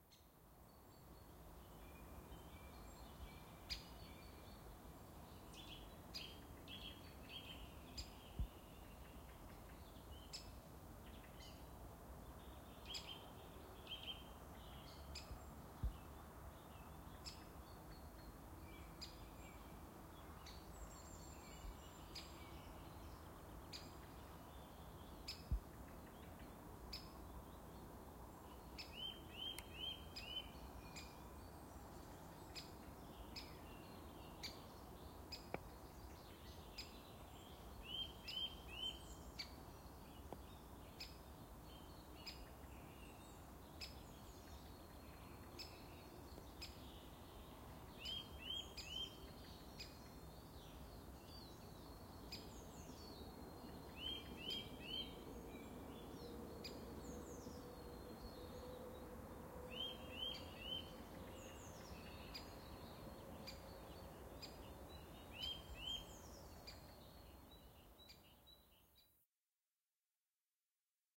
Woods lage vuursche birds afternoon March 2010
Zoom H4n X/Y stereo recording of birds in a forest, Lage Vuursche, the Netherlands to be exact. Typical Dutch feeling.
bird, birdsong, atmosphere, nature, ambiance, soundscape, woods, birds, background, quiet, ambience, field-recording, ambient, spring, background-sound, forest, atmos, general-noise